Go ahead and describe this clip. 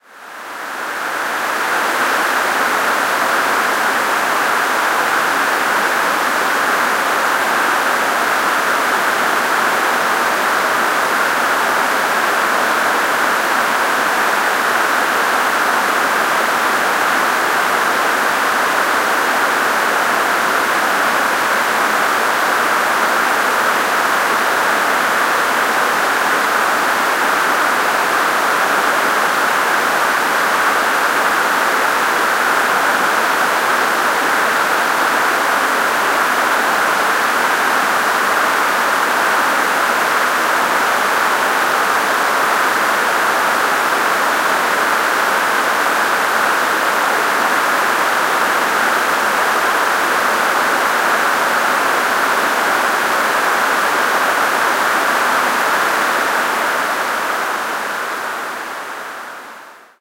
This sample is part of the "Space Drone 3" sample pack. 1minute of pure ambient space drone. Dense noise atmosphere.